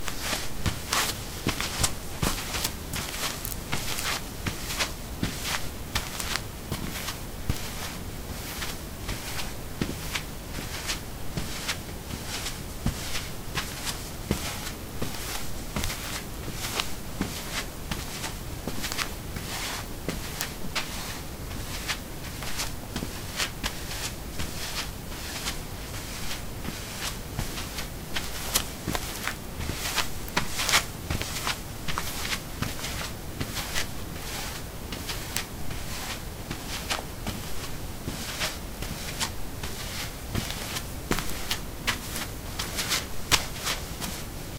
concrete 03a slippers walk
Walking on concrete: slippers. Recorded with a ZOOM H2 in a basement of a house, normalized with Audacity.
concrete, footstep, footsteps, slippers, steps, walk, walking